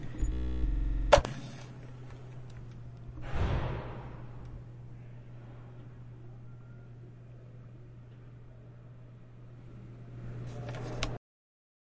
The sound of a high-school hallway in the middle of class. It was recorded with Zoom H4n's stereo microphone.
quiet, school, silence